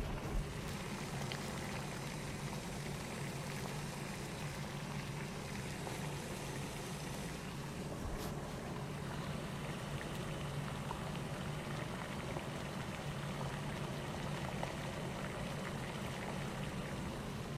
Boil water
The water boil in the pot.